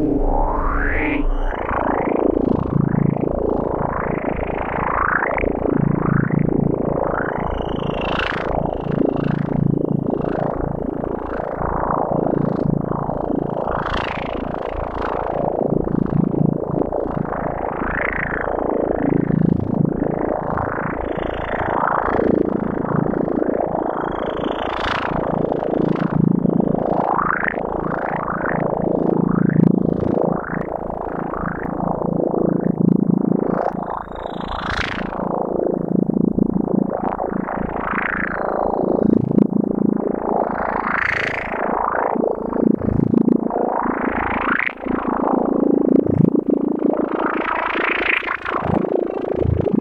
Buzzytron Remix 2End
A strange sound effect, using echo and stereo phasing effects.
echo, effect, psychedelic, swooping, weird